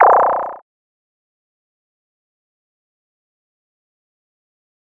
semiq fx 19
future, effect, sound, weird, strange, sfx, sci, spooky, freaky, fi, fx